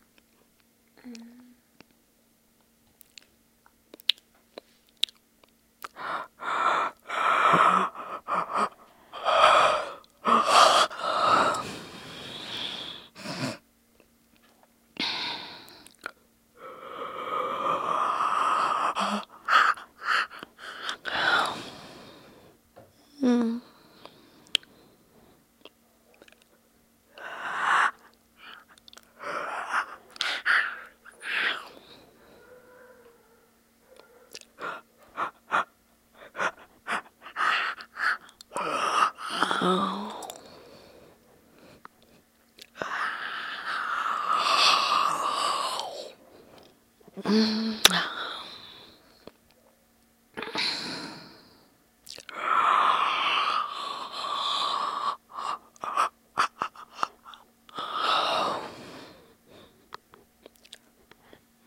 A lot of yawning
A full minute or so of different kinds of yawns
yawns, sleepy, yawning, yawn, bored